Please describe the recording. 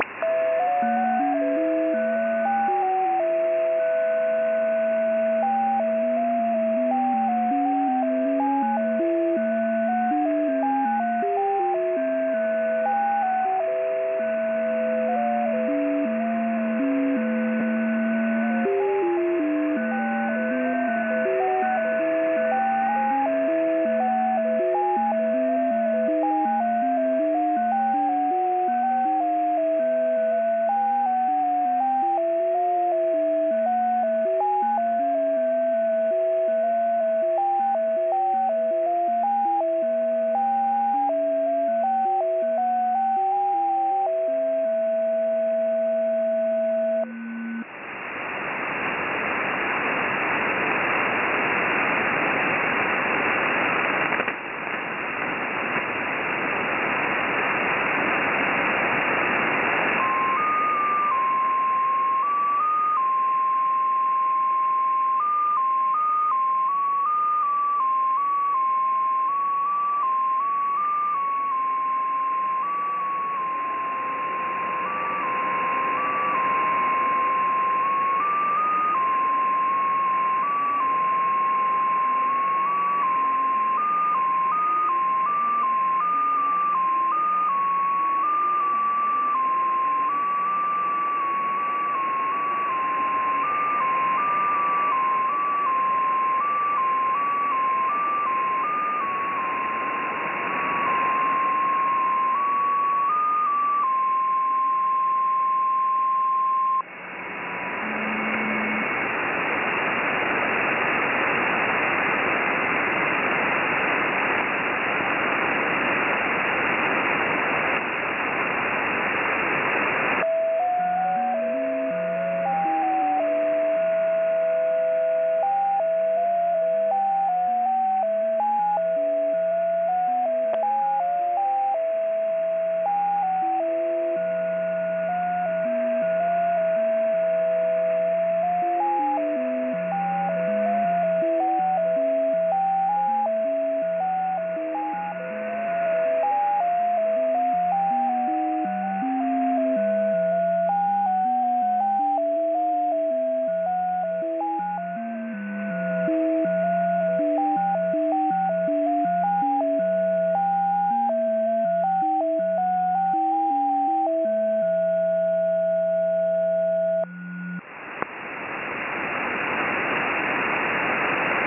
perhaps jt65 on 18102.5kHz USB
Made using the online remote shortwave receiver of University of twente in Enschede Holland:
Made in the part of the 17-meter ham band where maybe it's jt65, or one of the domino-ex modes is the dominant digital mode, with the receiver deliberately mistuned, in USB mode at it's widest setting to pull in multiple users across the band.
jt65, digital-modes, radio, domino-ex